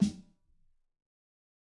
Fat Snare of GOD high tune 004
Fatter version of the snare. This is a mix of various snares. Type of sample: Realistic
drum, fat, god, high, realistic, snare, tune